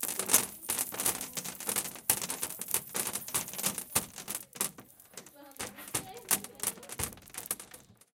SonicSnap HKBE 02
Beads on a rope hitting a door.